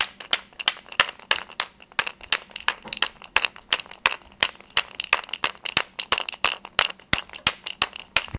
This is one of the sounds producted by our class with objects of everyday life.